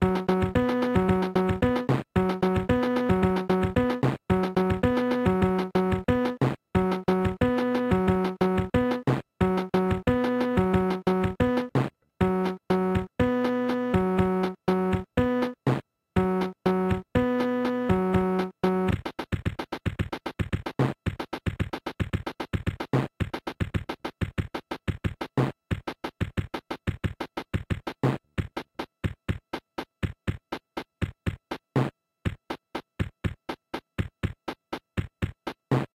The accompaniment section from a cheap kids keyboard - the description doesn't really match the sound.
The accompaniment plays at three tempos followed by percussion only version of the same.
accompaniment, auto-play, casiotone, cheesy, electronic, fun, kitsch, lo-fi, samba